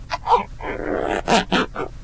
2 of 3 variations of zipper noises from a CD holder recorded with a cheap Radio Shack clipon condenser mic.